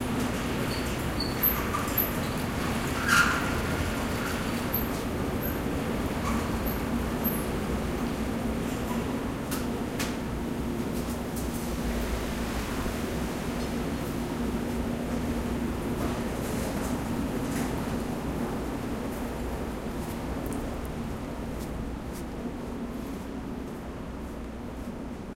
Warehouse Inside
Various sounds inside an industrial warehouse.
Field-Recording, Warehouse, Industrial